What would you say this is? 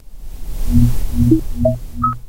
1st track :
Generate a pink noise
Delete frequencies up to 2kHz with the equalizer
Shape a crescendo - decreshendo envelope
2nd track :
Generate few very low frequences
Make it alternate four times betwin high and low strength with envelope tool
Apply a compressor
Put a decrescendo envelope
3rd track :
Generate a 300Hz sinus
Put an envelope with an agrssive attack and a rapid end (0.1s length)
Make it correspond with the end of the second low echo (track 2)
Generate the same sound, lower, with a 600Hz and 1200Hz sinus, corresponding respectively to the third and the fourth low echo.